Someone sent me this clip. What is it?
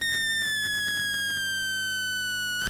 beep 003 falling
From the Mute-Synth-2.
A classic lo-fi bombing sound.
beep, electronic, falling-pitch, lo-fi, Mute-Synth-2, Mute-Synth-II